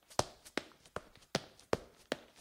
Human Run in Sneaks
A man or woman running in a school or building with sneakers.